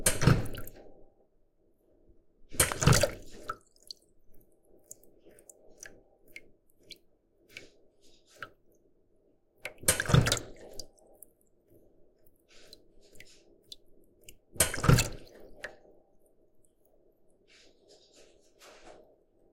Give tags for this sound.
Button Click Drips field-recording Switch Valve Water

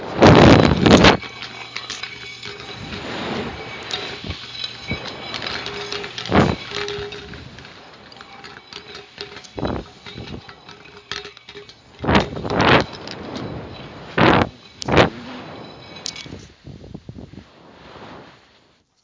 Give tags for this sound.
Audio Clase Tarea

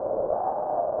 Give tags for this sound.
synth 440 a wave space image

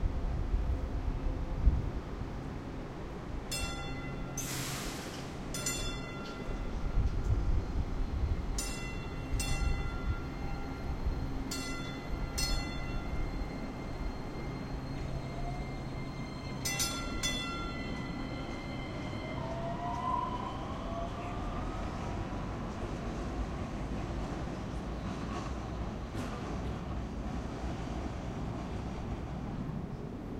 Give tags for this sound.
station dallas

